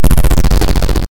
Random Sound 3
A random sound I made using bfxr.
Random, Sounds